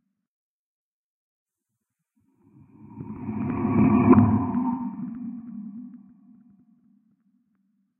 Low pitched and reversed
threatening wood sounds with a lot of reverb added.